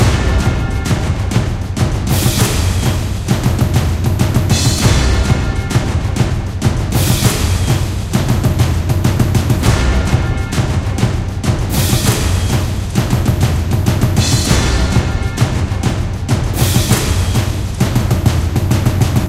YOUR DAYS HAVE ENDED
A bell and cinematic percussion
Tools of choice, Jeager, Project sam
bell, chime, orchestral, percussion, tubular